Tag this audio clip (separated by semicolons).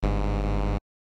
answer; negative; synthesizer; wrong